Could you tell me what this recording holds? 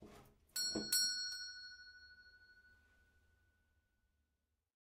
Doorbell Pull pull back Store Bell 01
Old fashioned doorbell pulled with lever, recorded in old house from 1890